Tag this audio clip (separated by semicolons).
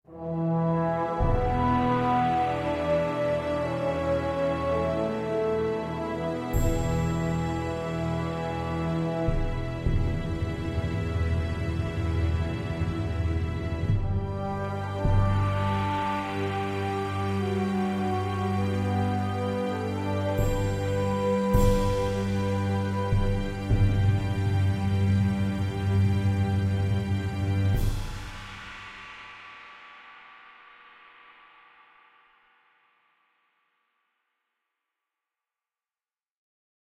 ambience; Ascension; atm; atmo; atmosphere; background; background-sound; drama; Gothic; King; normal; Throne